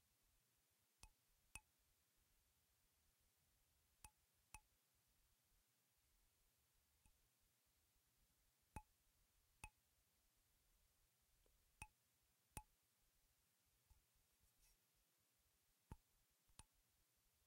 Flick Needle 2
flicking a needle
flick-needle, flick, flicking, needle